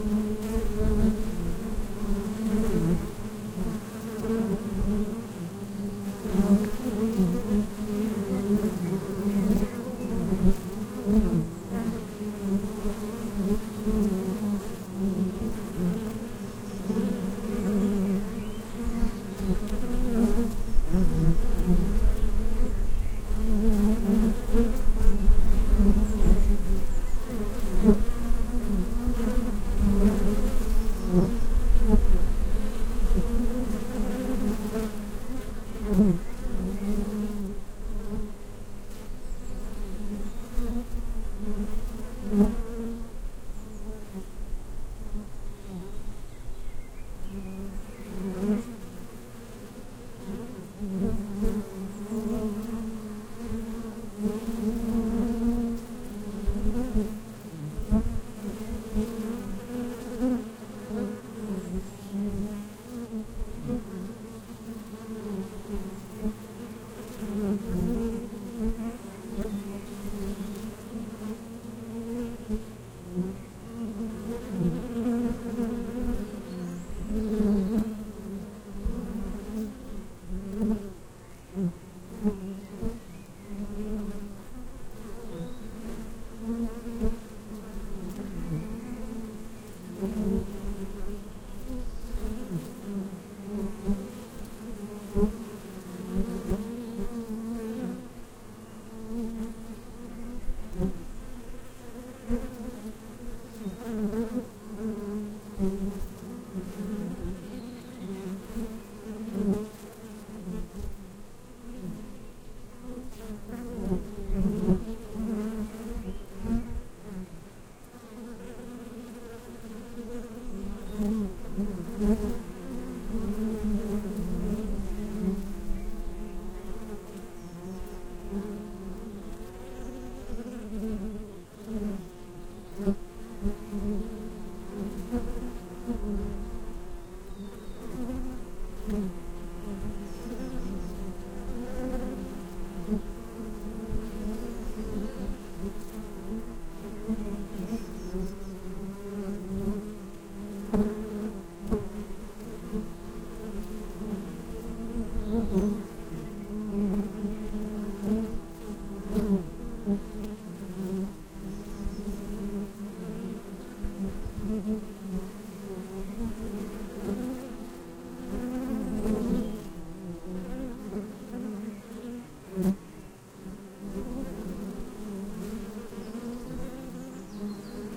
Domestic Bee Hive recorded with 2 Rode NT-5 microphones.